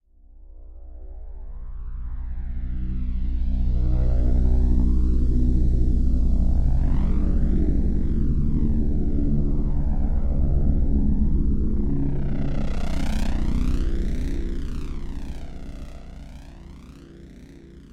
Im in hell, help me
A very spooky arrangement of pads
dark, hell, intense, pad, scary, serious